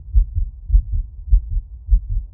Beating heart. Pressed my phone against my neck to record this.